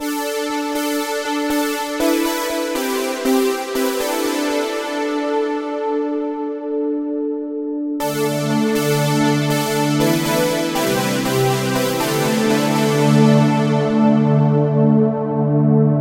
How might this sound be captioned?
Aetera loop
short electro pads, 120 BPM, A major
pads, BPM